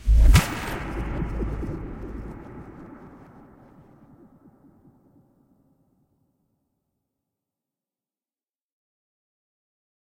Trailer hit 4
Industrial Sounds M/S Recording --> The recorded audio is processed in logic by using different FX like (reverse/reverb/delay/all kinds of phasing stuff)
Enjoy!
fx, effect, cinema, woosh, design, garage, boom, film, sound, effects, hits